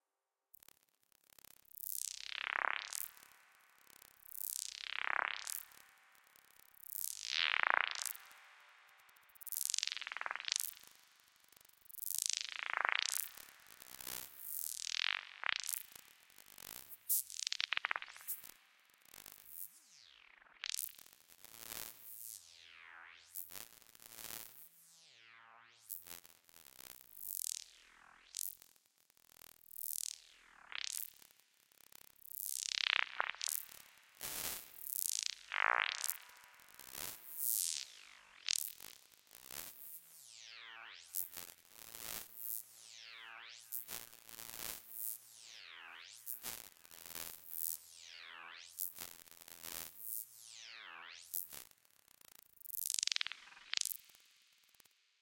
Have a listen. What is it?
Myself improvising with samples of magnets clashing together, and re-sampling using granular synth and a few fx.